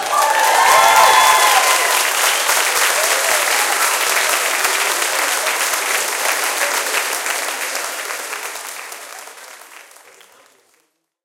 Small audience clapping during amateur production.